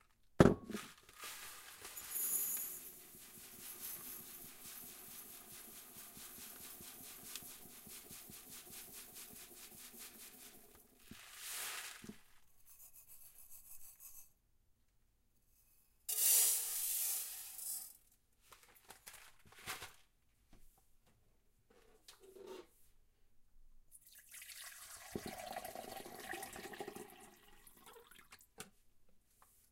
Measuring Rice
A field recording of pouring rice into a Pyrex measuring cup, then into a pot, then measuring water to cook it in.
field, cooking, rice, pour, recording